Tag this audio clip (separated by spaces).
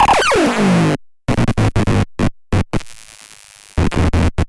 bass circuit-bending musique-incongrue